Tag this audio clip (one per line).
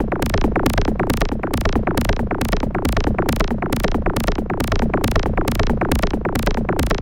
techno
loop